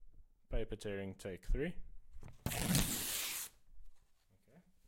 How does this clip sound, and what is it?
Slowing tearing an A4 paper